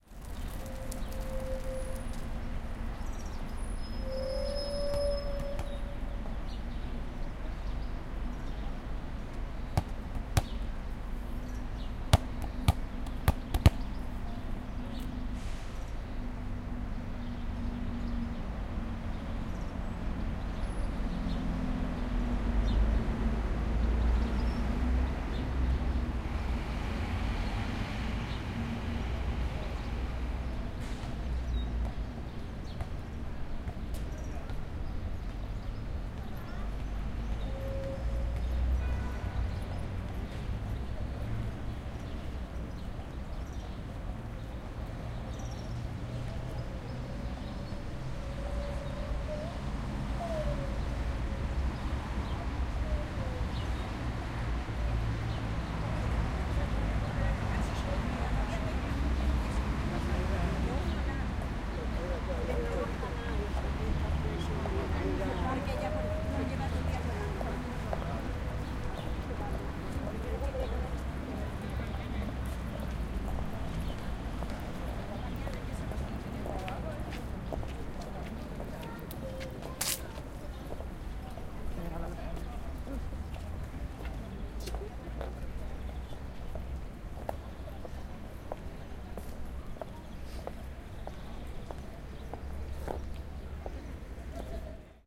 0214 Park Canovas
Birds, traffic, people talking Spanish and walking.
20120324
caceres, spanish